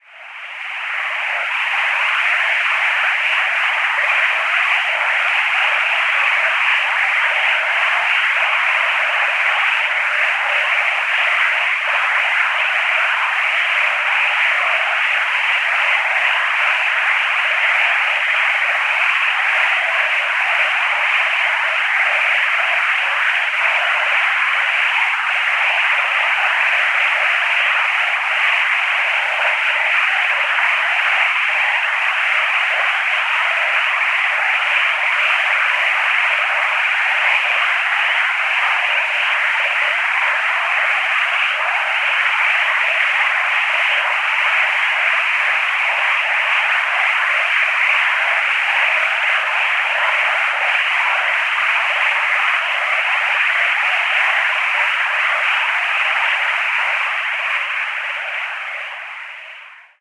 This sample is part of the "Space Drone 3" sample pack. 1minute of pure ambient space drone. Radio frequencies & noises.